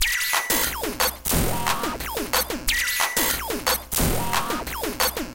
Industrial noise loop (180 bpm)